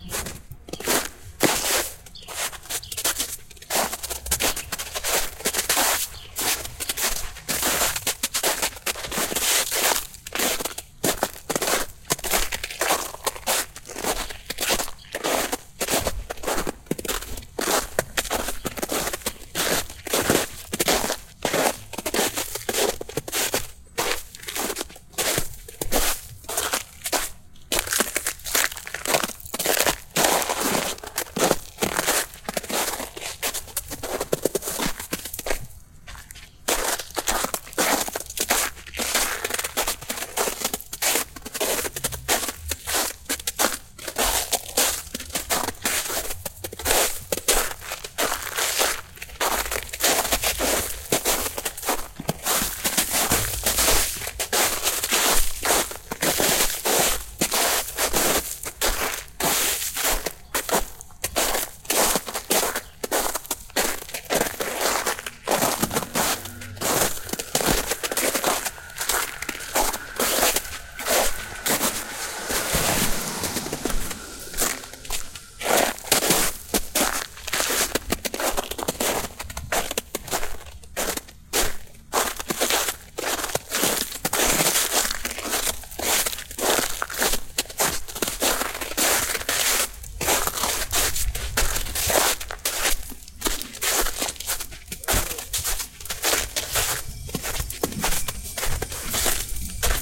Recorded by Peter Speer. Formatted for use in the Make Noise Morphagene.
Footsteps over ice and snow. Recorded in stereo on a Zoom H6, December 17, 2018 in Asheville, NC.
This Reel has been divided into 24, evenly spaced 4-second Splices.
I've found some great textures through playing the Reel back at 1x speed, with Gene-Size, Morph, Slide and Organize modulated by sequencers, random CV and cycling LFOs. Time stretching the Reel also leads to some fantastic new environments. Enjoy!